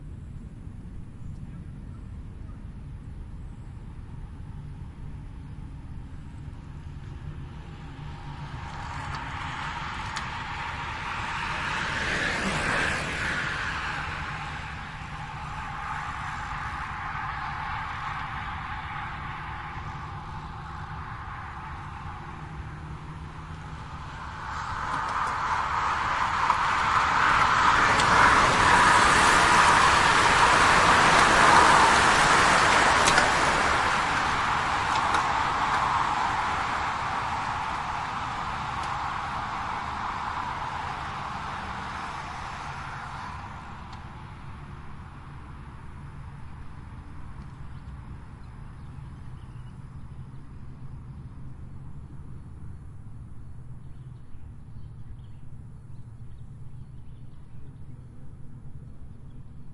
Single bike passes and then large group with some gear change 'chunking' noises. Best bike pass-by recording in the pack!
Part of a series of recordings made at 'The Driveway' in Austin Texas, an auto racing track. Every Thursday evening the track is taken over by road bikers for the 'Thursday Night Crit'.